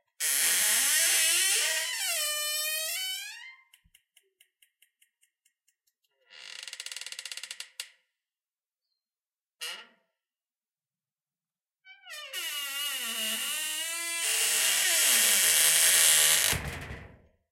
This is from my front door before applying lubricant to get rid of the creak/squeak. I used my Zoom H2n and then removed the ambient noises with Adobe Audition.